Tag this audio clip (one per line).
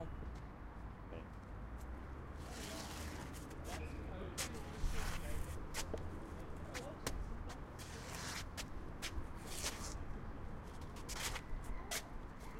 Shuffling
Group
Feet
Zombie
Crowd